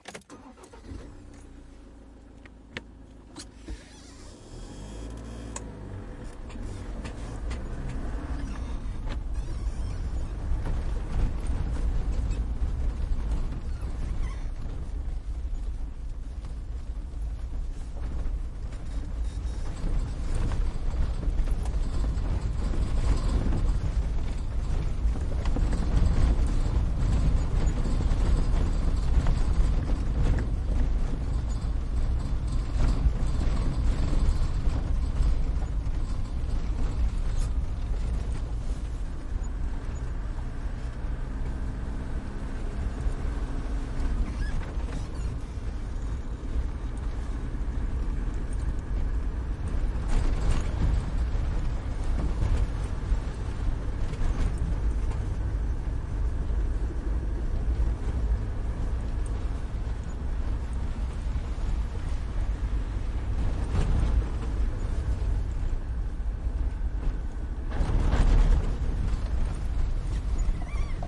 Car interior - key rattling, ignition, chair squeak, bouncing, rumble, rattling
bouncing, car, chair, ignition, interior, key, keys, rattling, rumble, squeak, vehicle